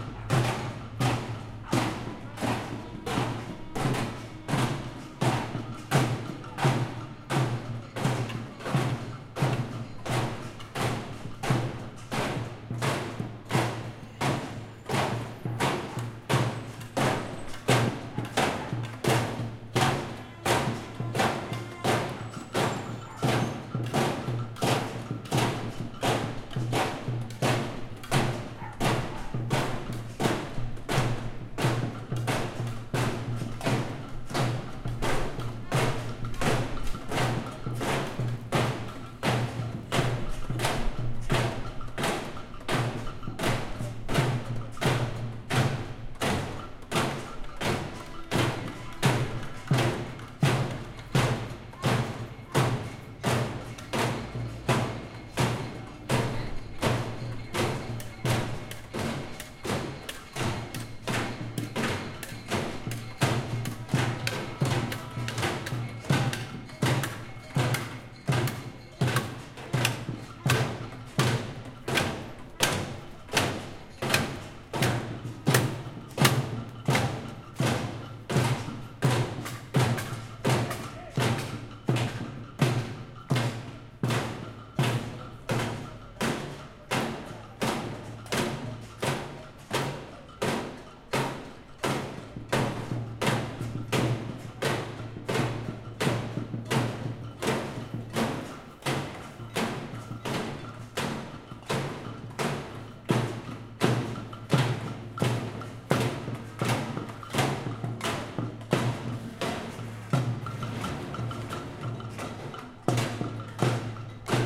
Street protest due to electrical power shutdown, Buenos Aires, Feb. 2015
Aires, batucada, Buenos, gritos, protesta, Riot, street-protest